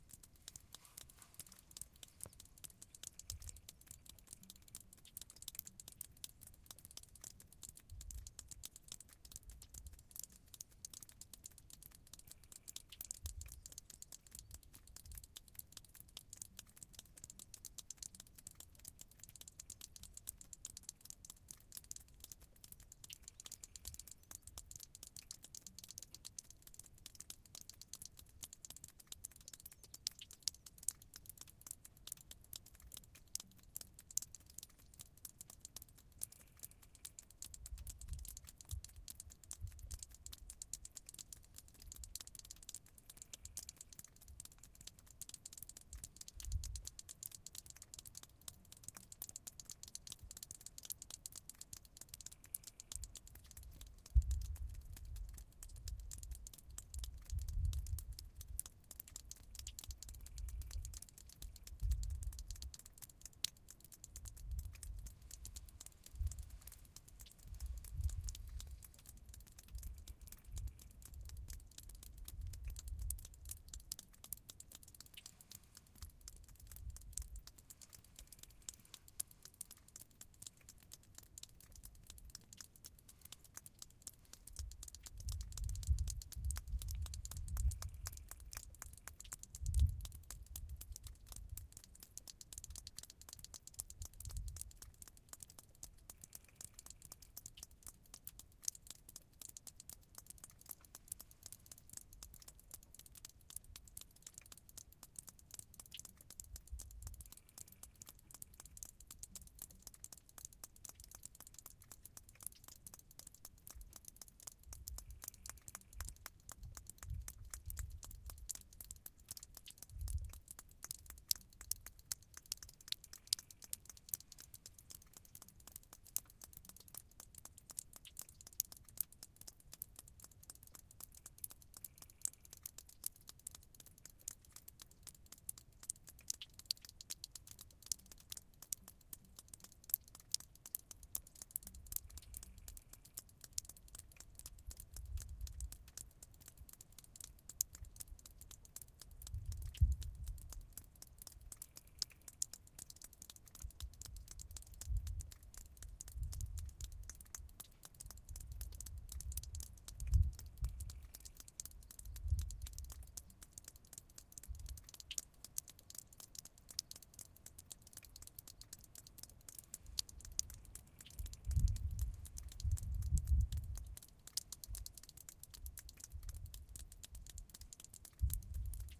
Recorded on 20.05.2020 On Schneealpe in Styria, Austria. Its funny - and a bit scary - that one can see the exact crevice the recording took place from a satellite.
There was a bit of snow left from winter but rapidly melting. Drops fall from 30 cm to the rock below in different rhythms.
Recorded with 2 KM184 AB ~40cm apart into sounddevices 744. Unfortunately i had no proper windscreen and so there is quite some rumble up to 120Hz.